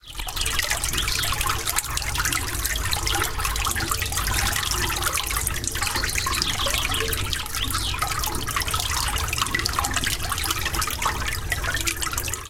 Water in drain
Water flows in a drain, Version 1 - recorded with Olympus LS-11
drain, flows, water